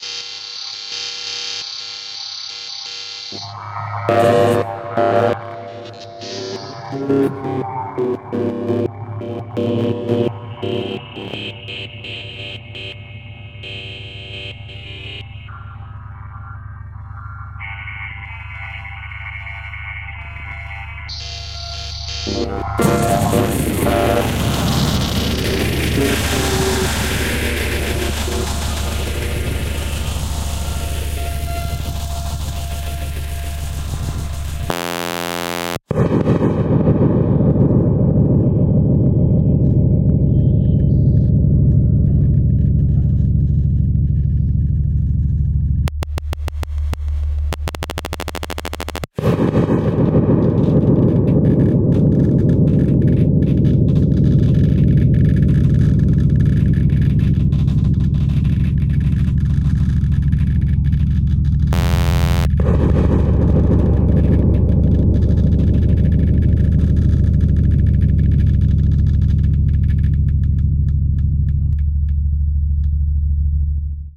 Digitally stretched synthesised phrase transformed into series of explosion-like and rattling sounds. Created with speach synth, sampler and reverberator.